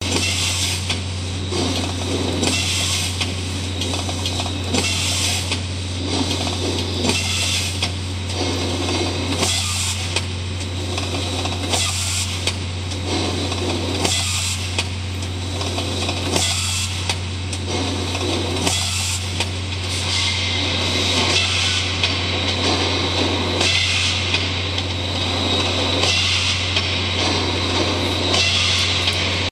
industrial machine hydraulic
ambience, ambient, atmosphere, creepy, dark, deep, deep-space, drone, effect, factory, field-recording, freaky, fx, horror, hydraulics, indoor, industrial, machine, mechanical, metal, noise, recording, robot, scary, sci-fi, soundscape, spooky, steam, terrifying, thrill